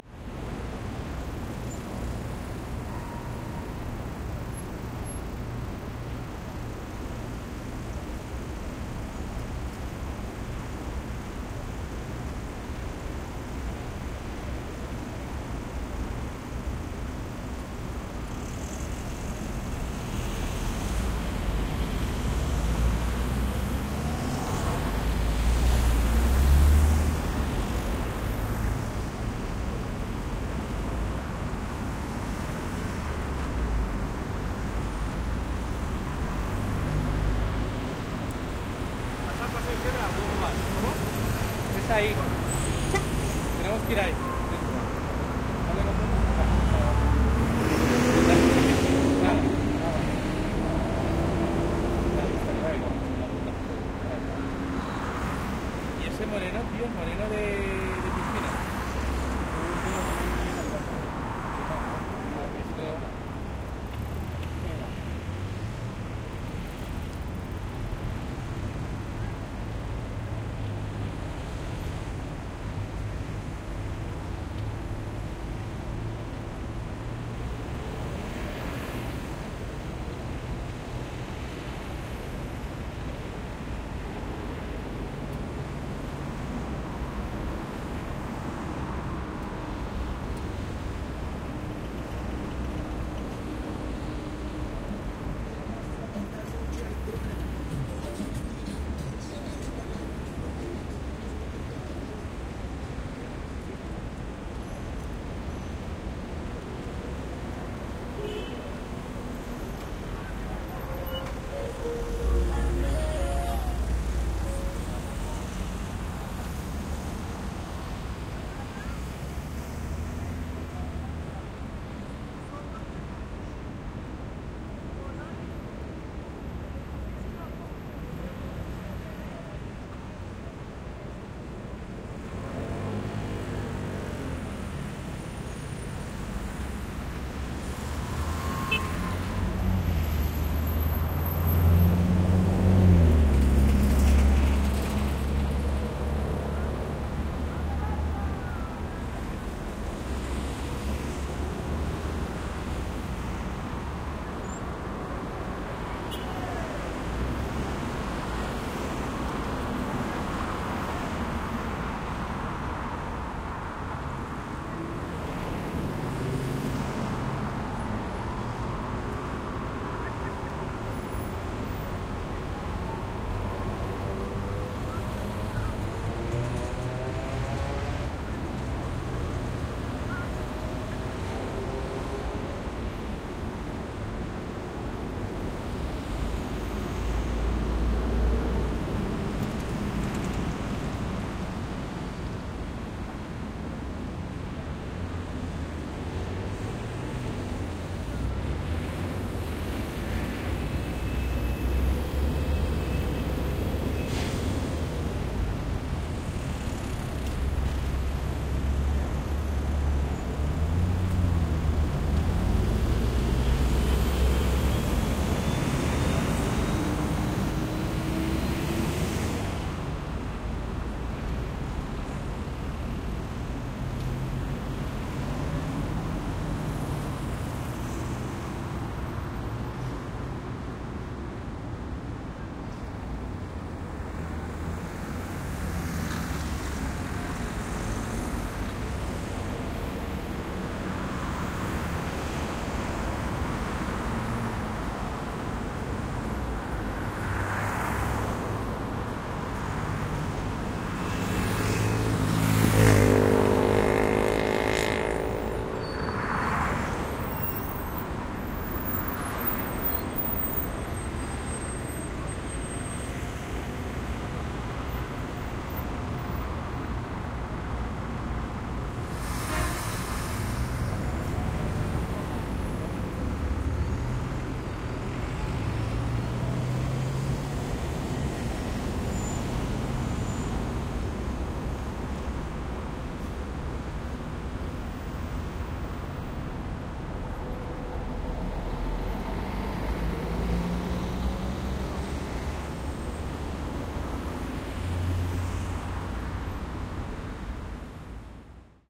Plaza Espana Binaural
Recording using a Soundfield SPS200 and Zaxcom Nomad, processed to binaural with Harpex-B
ambience, ambient, binaural, cars, city, field-recording, Madrid, noise, people, plaza-espana, soundfield, soundscape, street, town, traffic